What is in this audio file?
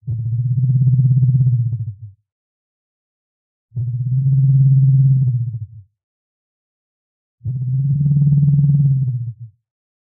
A sound for a large herbivorous creature (some kind of dinosaur) that dwells in grasslands, for Thrive the game. Made from scratch using Harmor, Vocodex, and some other plugins from Fl Studio 10.
It has some reverb, resonance, vibrato and tremolo for more realism.